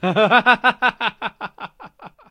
Small outburst of laughter.